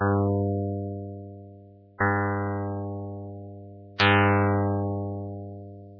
These plucked-strings-like sounds were created with the technique invented and developed in my PhD, called Histogram Mapping Synthesis (HMS). HMS is based on Cellular Automata (CA) which are mathematical/computational models that create moving images. In the context of HMS, these images are analysed by histogram measurements, giving as a result a sequence of histograms. In a nutshell, these histogram sequences are converted into spectrograms which in turn are rendered into sounds. Additional DSP methods were developed to control the CA and the synthesis so as to be able to design and produce sounds in a predictable and controllable manner.